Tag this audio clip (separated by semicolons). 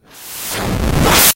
12; drum; drums; engineering; kit; lo-fi; lofi; machine; operator; po; po-12; pocket; sample; teenage